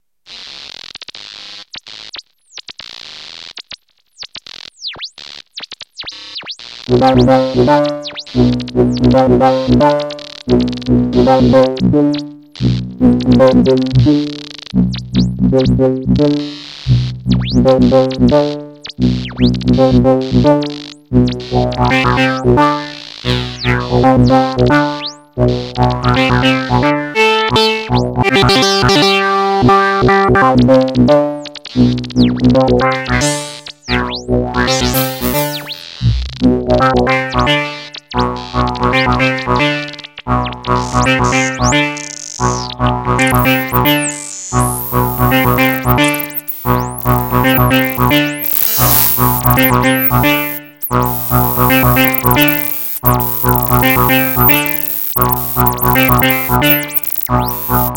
Freehand Or tracked by a 505 one or the other
2, lead